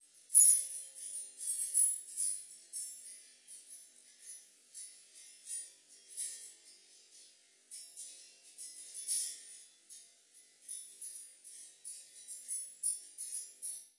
HANGERS1 Delaymod
Clink of wire hangers - modulation delay added
chime, clink, electro, robotic, spring